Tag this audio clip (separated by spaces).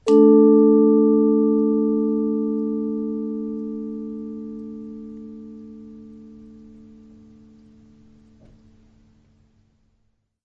chord mallets percussion vibraphone